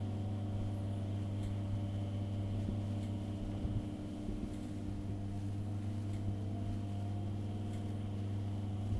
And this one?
KitchenEquipment OvenLoop Mono 16bit
16, bit